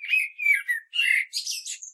Turdus merula 10
Morning song of a common blackbird, one bird, one recording, with a H4, denoising with Audacity.
bird, nature, field-recording, blackbird